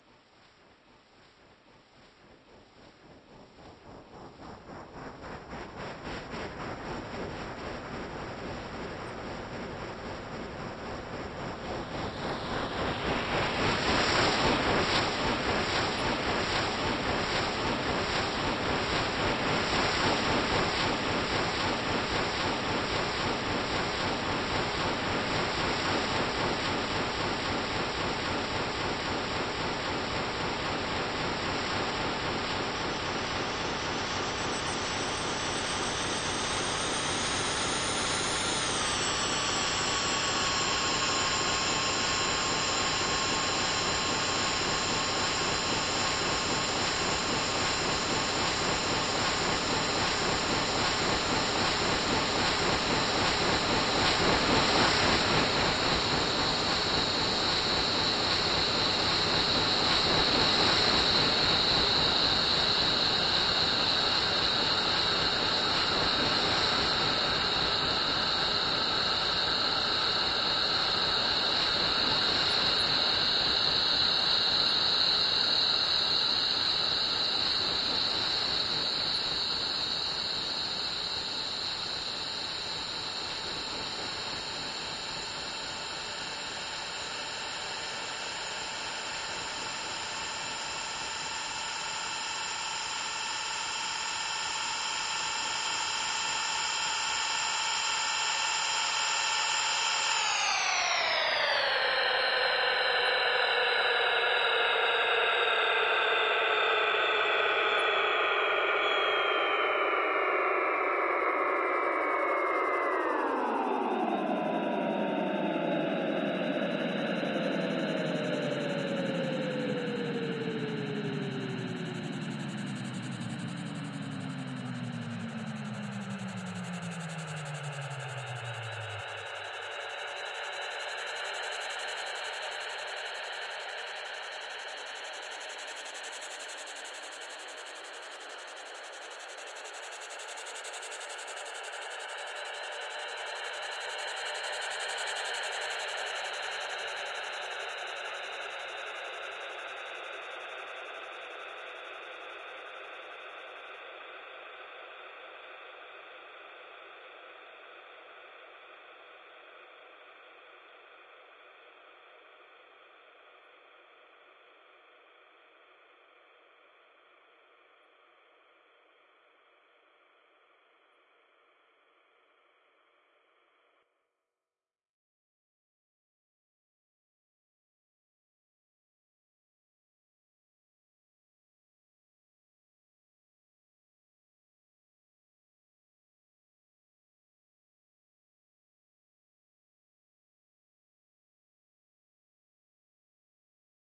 FXS MORE
sample to the psychedelic and experimental music.
AmbientPsychedelic, ExperimentalDark, Noise